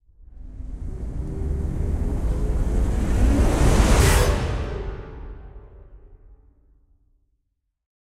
Orchestra Cluster Hit (120 BPM)
Cinematic Cluster Hit with a small orchestra touch.
Cinematic, Cluster, Effect, FX, Film, Hit, Movie, Sound